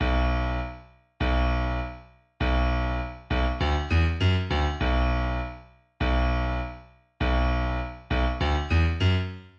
hip hop piano 100 bpm
piano sample for general use